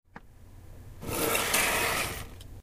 Mettal Scrape R-L
Sounds like "crrrrrr!"
metal, metalic, scrap